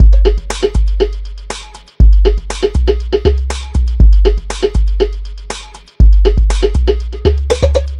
120 Brettles Beat
It's four breaks back to back. I've applied an amplitube effect to it. The samples I used can be found here:
This was posted for the mini dare continuum 4 challenge which can be found here: